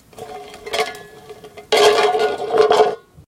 Rolling Can 26
Sounds made by rolling cans of various sizes and types along a concrete surface.
steel, roll, tin, rolling, tin-can, can, aluminium